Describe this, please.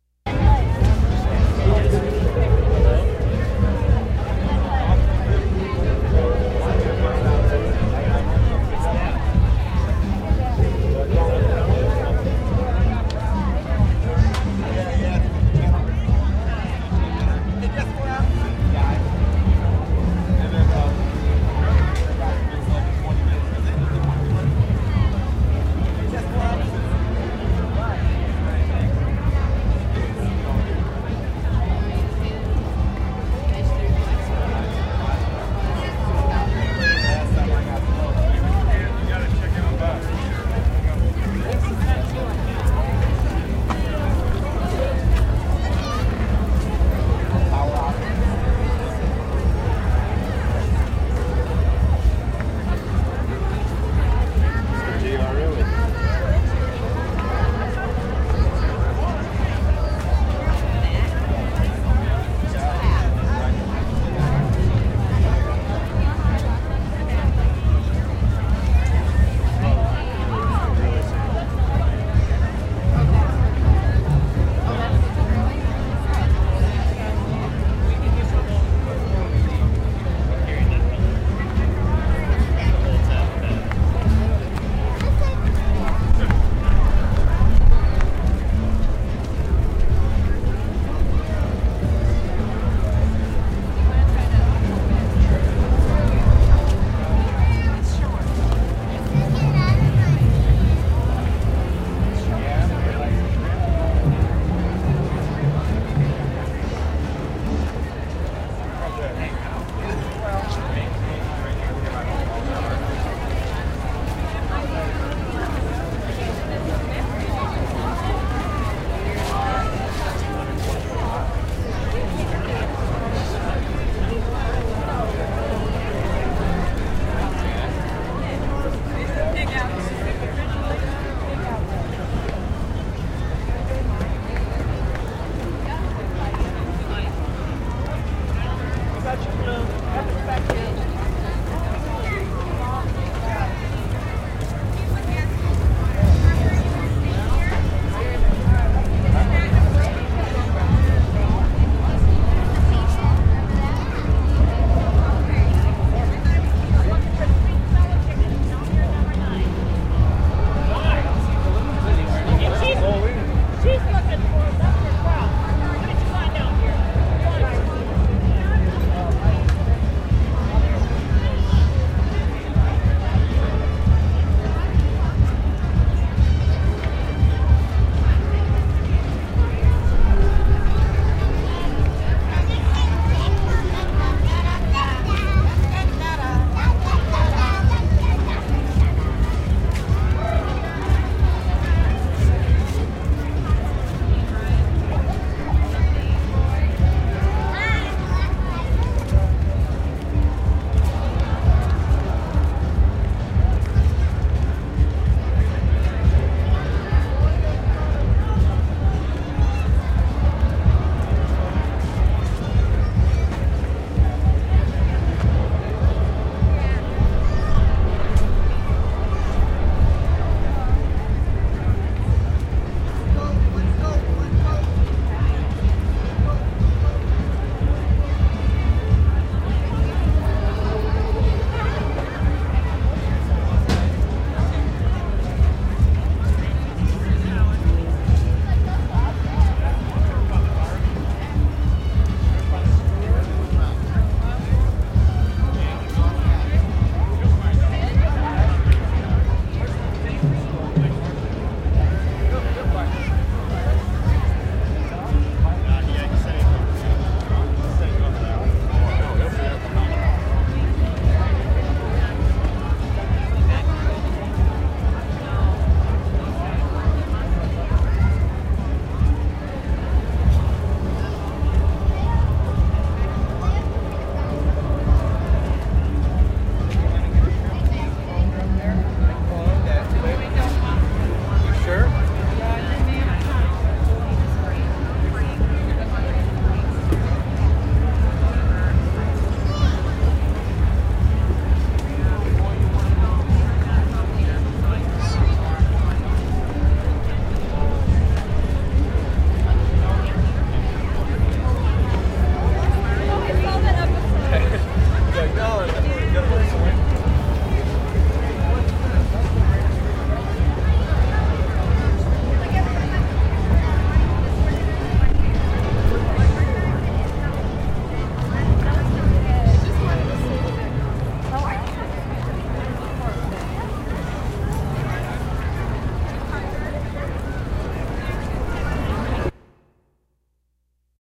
local passersby were recorded with rode stereo condenser
festival, grand, michigan, rapids